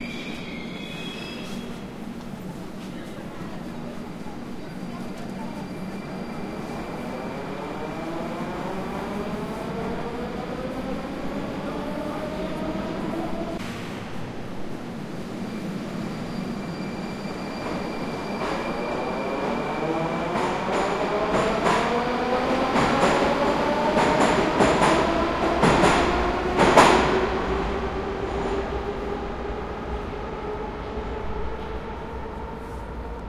ambience, metro, station, city, Moscow
Moscow metro station ambience.
Recorded via Tascam DR-100MkII.